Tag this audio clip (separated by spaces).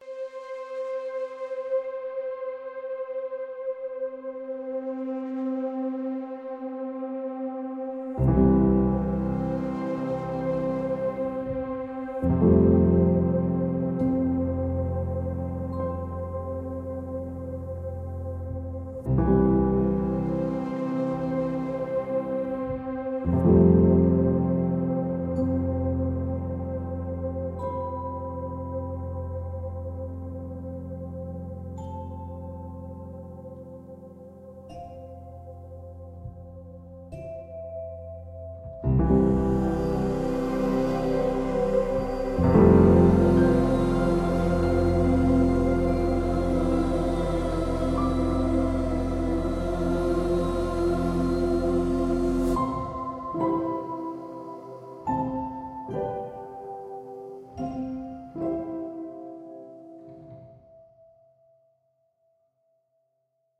competition jon-meyer orchestra piano short